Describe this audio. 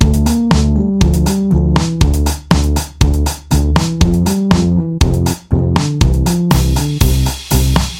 Loop Little Big Adventure 07
A music loop to be used in fast paced games with tons of action for creating an adrenaline rush and somewhat adaptive musical experience.
battle, game, gamedev, gamedeveloping, games, gaming, indiedev, indiegamedev, loop, music, music-loop, victory, videogame, Video-Game, videogames, war